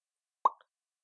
bubble sound
Pop the bubble
boop, bubble, pop